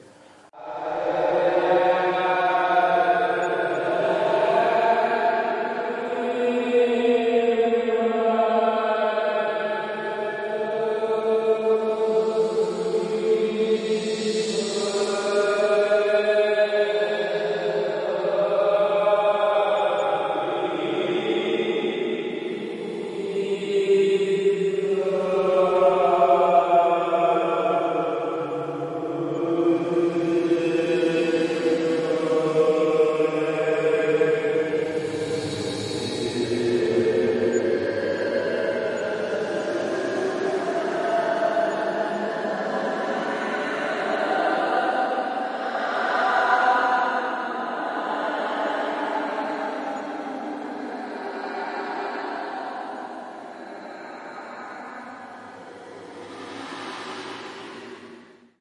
Original audio was recorded by Sony IC recorder and Paulstretch was added in Audacity.